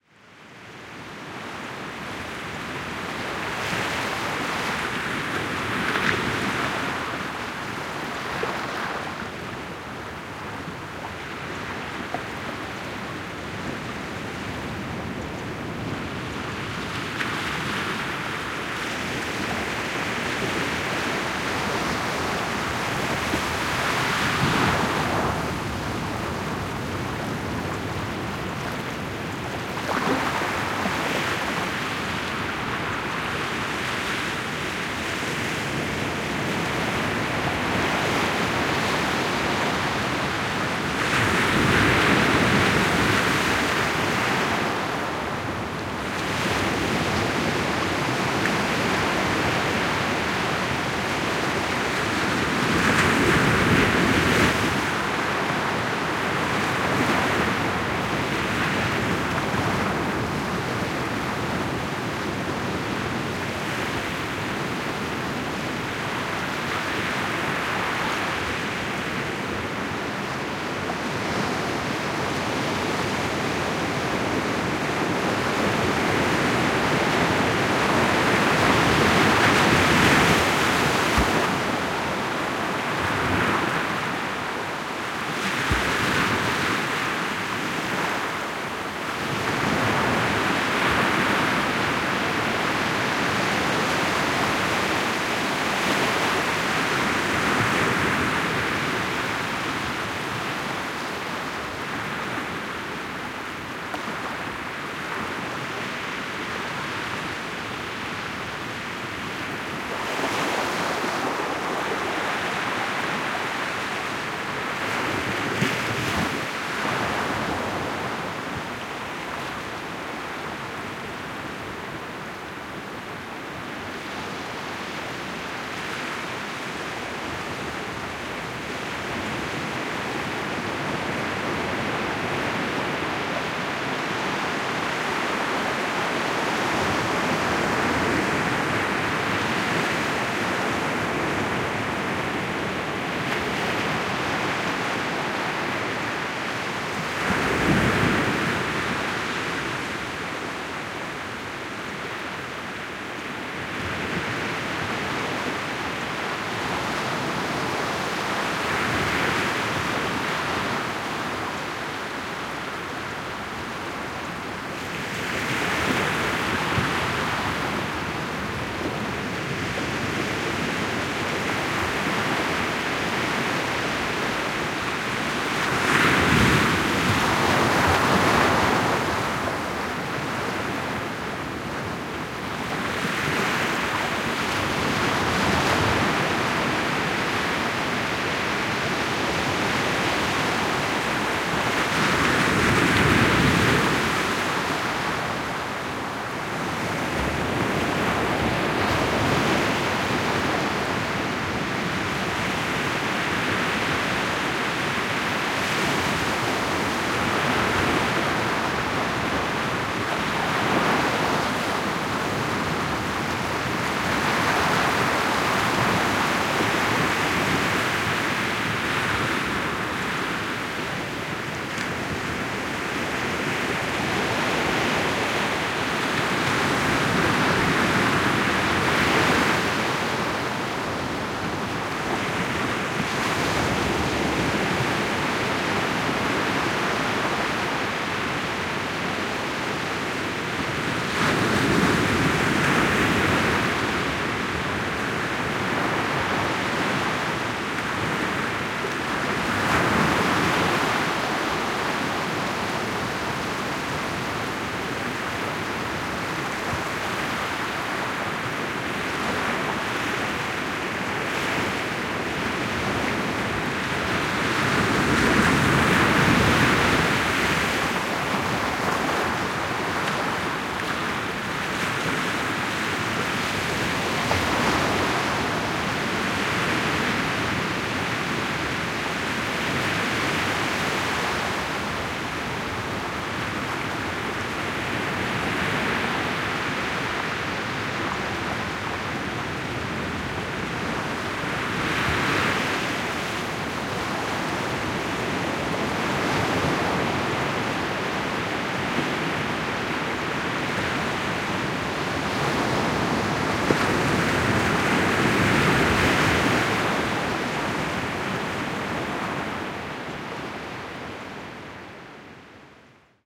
WATRWave small waves breaking at deadman's cove TK SASSMKH8020
A small inlet where waves come to a sandy beach and swirl around some rock formations.
Microphones: Sennheiser MKH 8020 in SASS
Recorder: Zaxcom Maxx
relaxing, shore, splash, ocean, nature, waves, water, beach, field-recording, sea, outside, slosh